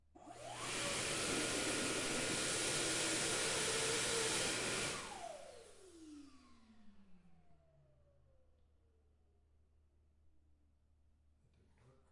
Dyson Hand Dryer short
Dyson Commercial Bathroom Hand Dryer.
Bathroom, Blower, Dryer